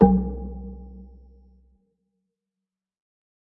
Tweaked percussion and cymbal sounds combined with synths and effects.
Wood, Oneshot, Short, Log, Huge, Deep